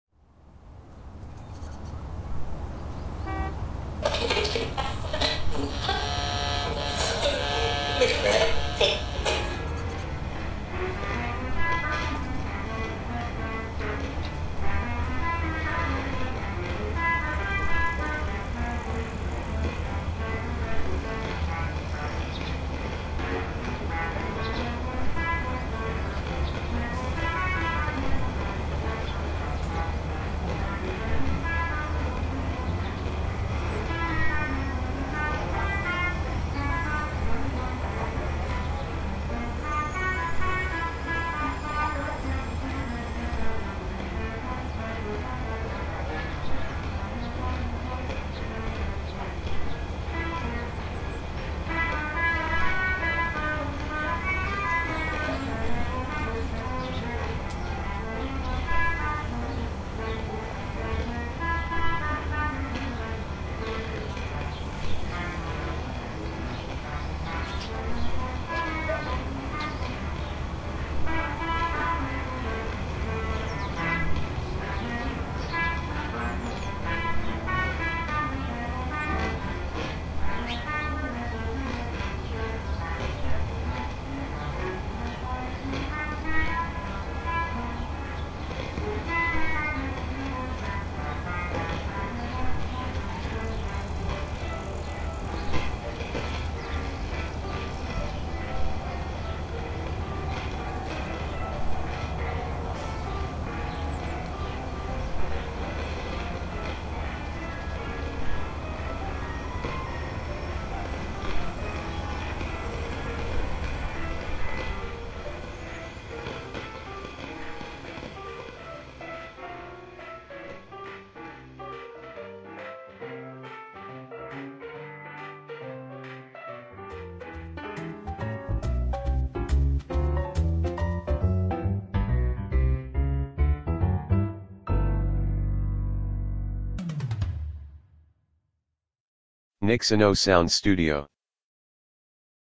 street ambience and shopping center ambience with radio music
9 sound line : 5 line street + 1 line shipping + 1 line white noise + 1 line music + 1 line radio noise
recorded by Blue spark mic and Steinberg UR22 sound card
Reverb, 30 Bond EQ, saturn and volcano plugin
music, park, down-town, background-sound, soundscape, sound-effect, amb, noise, cinematic